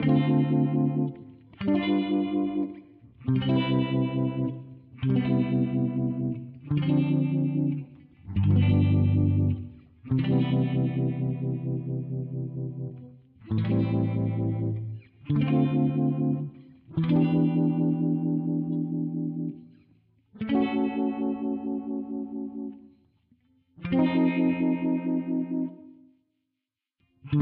Jazz Vibe
140bpm, 70bpm, C, guitar, jazz, major